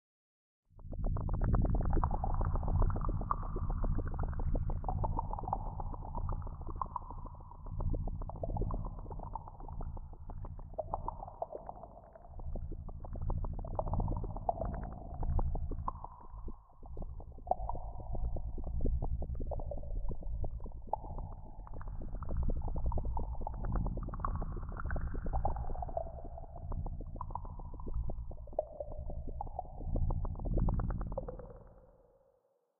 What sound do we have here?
Boiling Stew
Boiling hot stew / bubbles.
stew, water